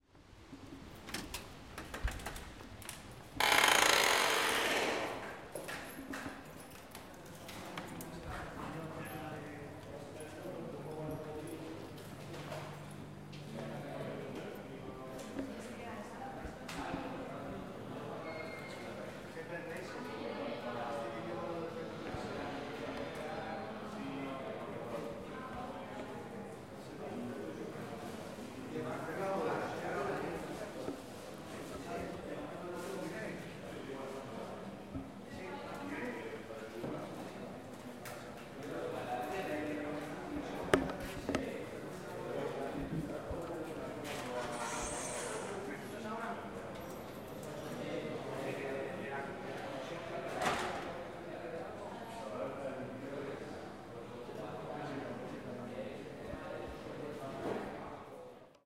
Gym'sHall
It is a sound of a gym's hall. It is a distant plane sound. We can we can appreciate different sounds as it is an environment. it is recorded with a tape recorder zoom H4n
Door, Gym, Hall, opening, s, Steps, Talks